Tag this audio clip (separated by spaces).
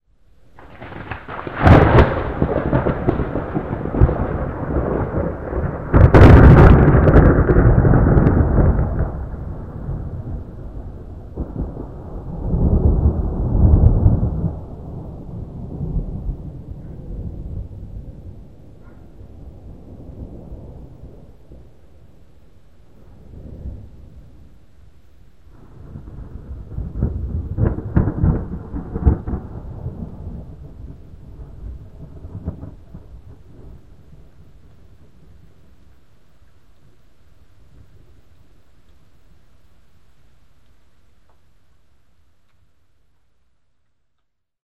boom crackle crackling field-recording lightning nature storm thunder thunderstorm weather